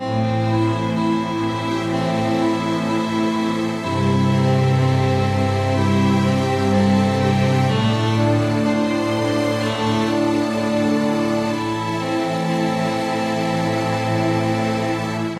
Loop InterstellarHero 00
A music loop to be used in storydriven and reflective games with puzzle and philosophical elements.
game, gamedev, gamedeveloping, games, gaming, indiedev, indiegamedev, music, music-loop, Philosophical, Puzzle, sfx, Thoughtful, video-game, videogame, videogames